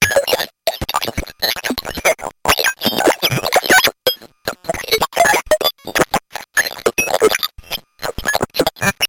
This is a short sample of some random blatherings from my bent Ti Math & Spell. Typical phoneme randomness.